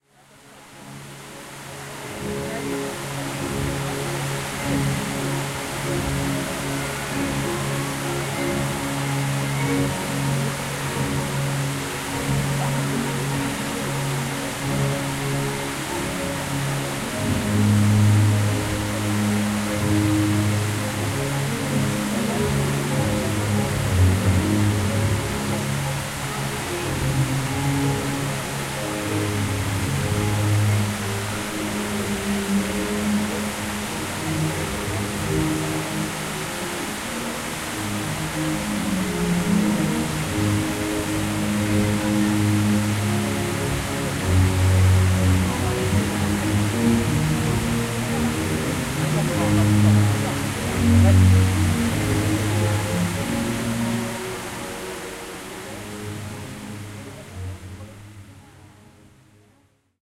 Versailles - Fountain - Bassin de Latone
Recorded during Musical Fountains Show at Versailles palace (by night).
Fountain (Bassin de Latone). Music playing in background.
Fountain, Summer, Versailles, Water